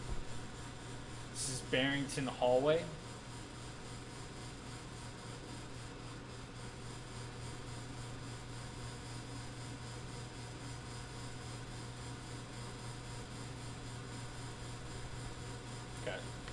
Hallway with Water Fountain Noise
-Ambient noise of a hallway with a loud motor sounding noise coming from a water fountain.